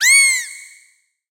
Moon Fauna - 64
Some synthetic animal vocalizations for you. Hop on your pitch bend wheel and make them even stranger. Distort them and freak out your neighbors.
alien, animal, creature, fauna, sci-fi, sfx, sound-effect, synthetic, vocalization